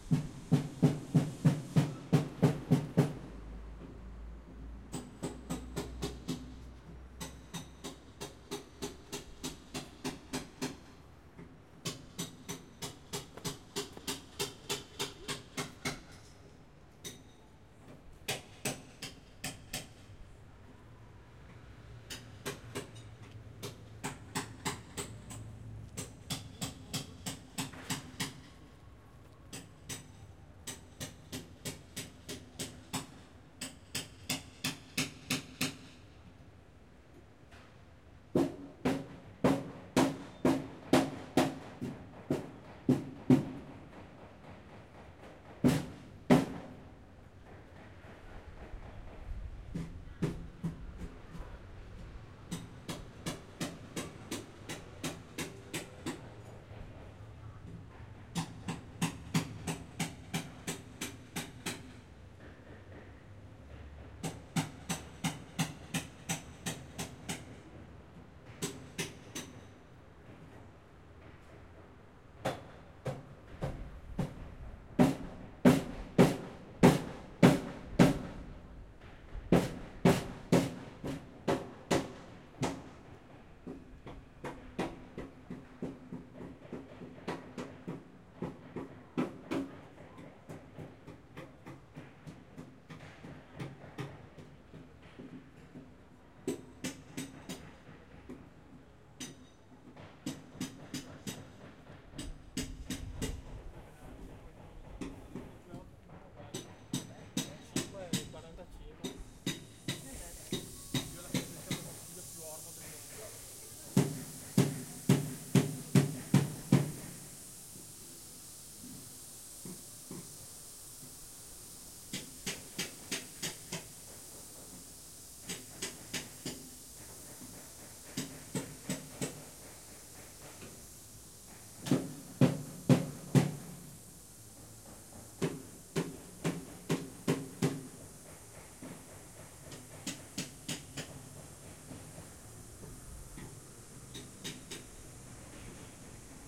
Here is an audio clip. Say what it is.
Building Restoration Work in Progress 01
building, restoration, working